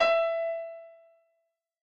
layer of piano